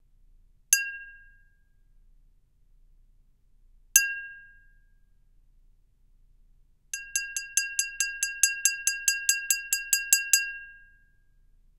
GLASS STRIKES 1
-Glass clanking and striking
mug, clank, striking, clanks, cup, hits, strike, glass, strikes, hitting, clanking, hit